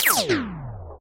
Laser Shot Small 1
Small laser gun shot.